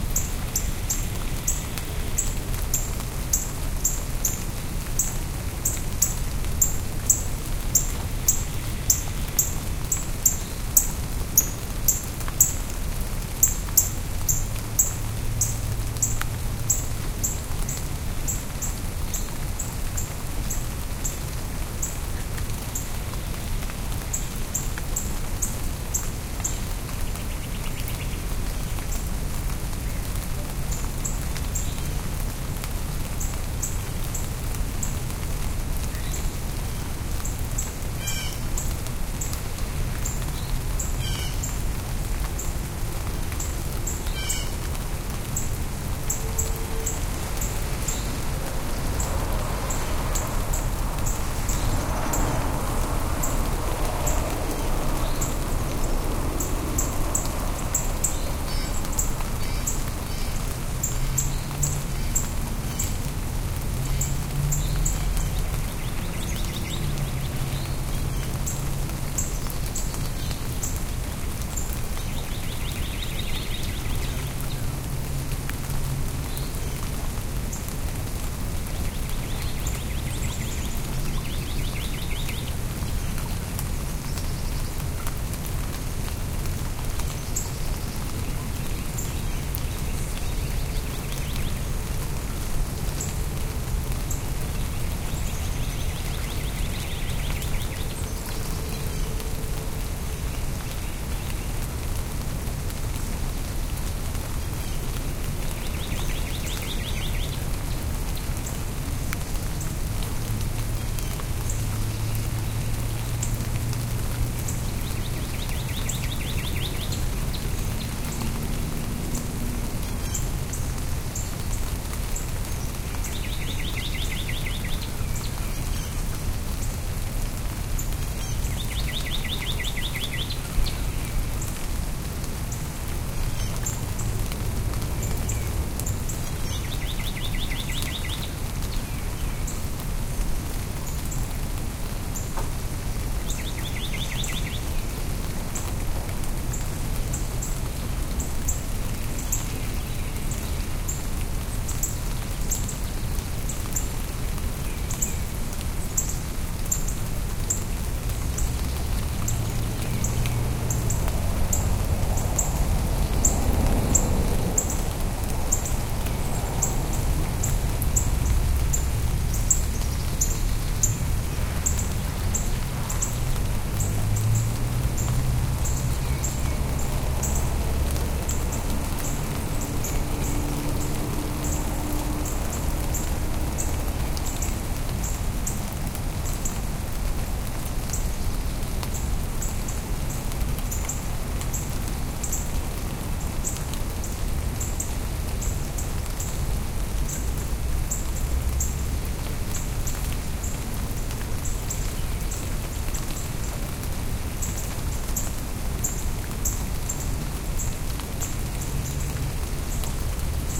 light rain ambience
Some light rain, various birds chirping, constant light wind and a few cars passing. Edited in Audacity.